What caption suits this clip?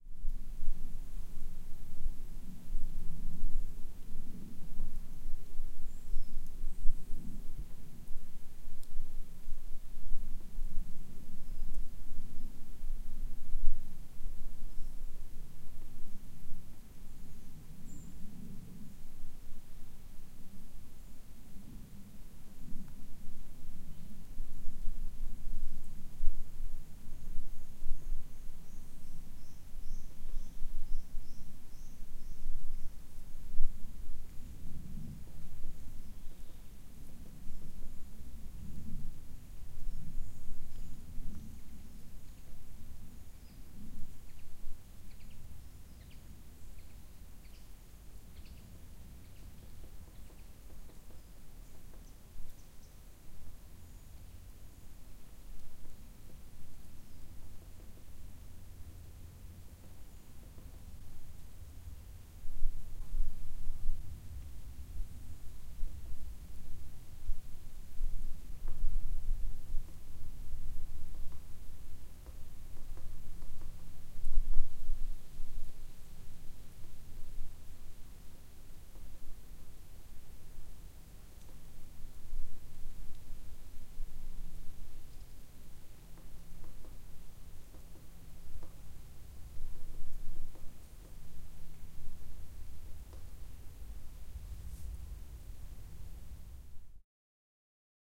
Quiet Ambience (near forest area)
Recorded somewhere in Krippen, Germany - the surrounding area was very quiet and full of trees. Sometimes you can hear a bird chirping and something that seems like somebody doing some wood related activity.
quiet, field-recording, ambience